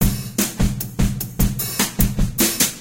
4/7 - 150bpm - Drumkit - Proog Rock beat
A special drumloop for the proog rock lovers.
player, drums, reaper, keyboard, midi, rock, roll